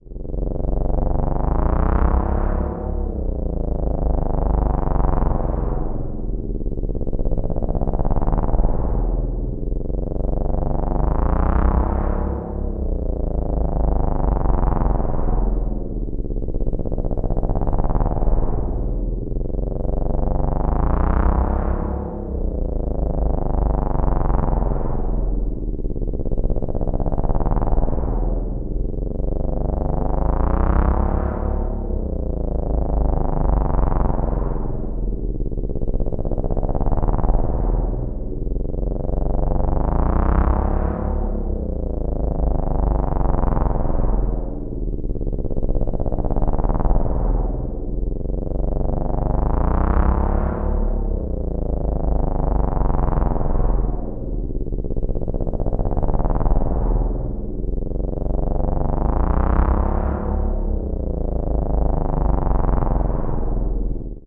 Modular sample nº1